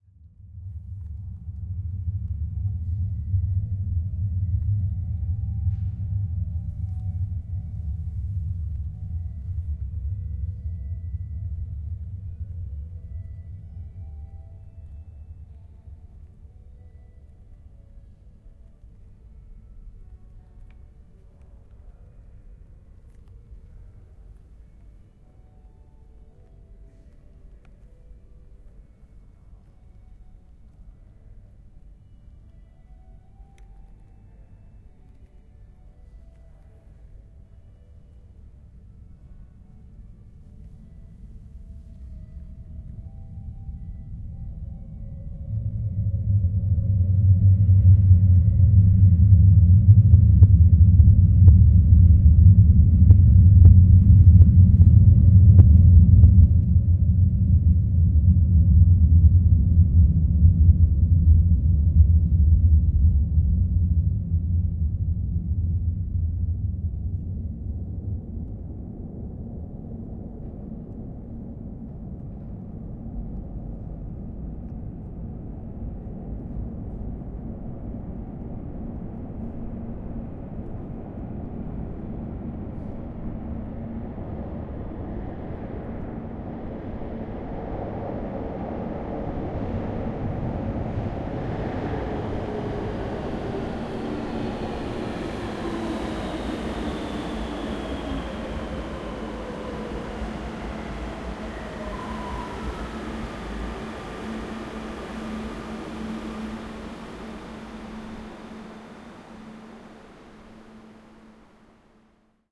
metro arrival recorded at tetuan station in barcelona, with a special low frequency. recorded with an edirol, at 16 bits.
barcelona, metro, transport